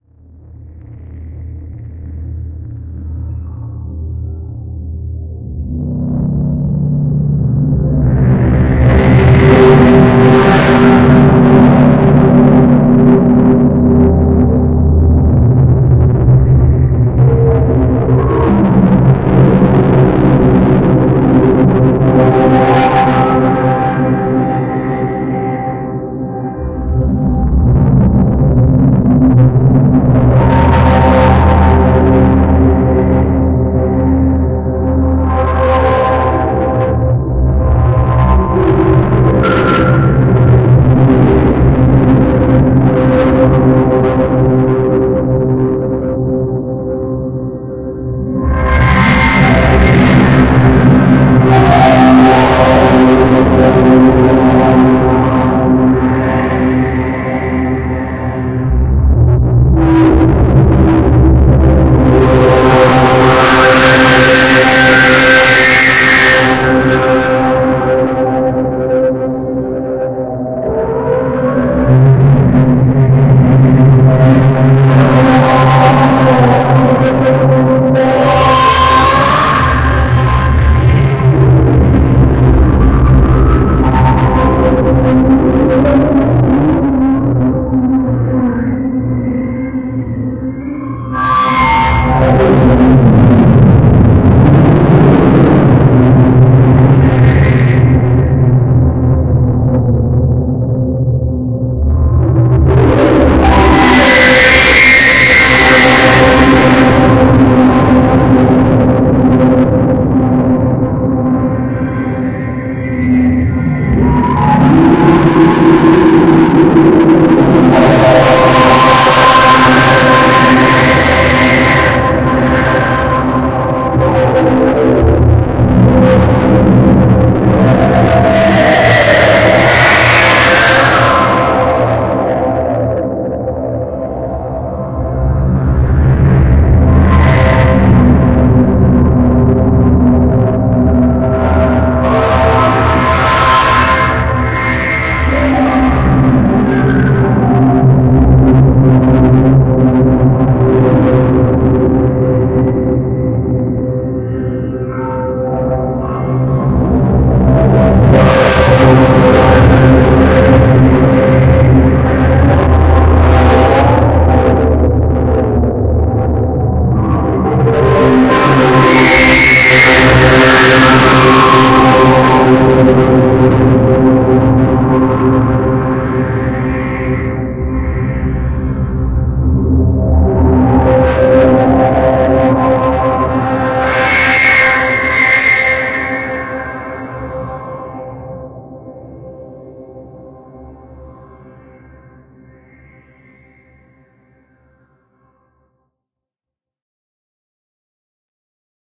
Distorted drone on C with rich overtones created in Reaktor 5 processed with iZotope's Trash. Watch you ears and speakers!